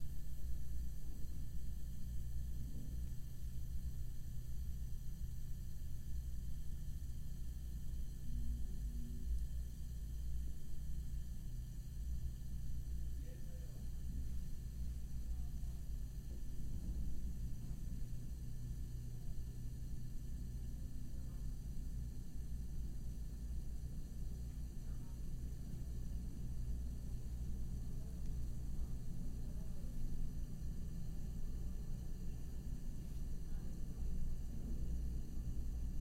Sand clock
ambient, atmosphere, clock, drawer, glass, glassyar, metal, metalwheel, noise, office, sand, sandclock, stamp, stuff, stuffindrawer, wheel, wood, wooddrawer, yar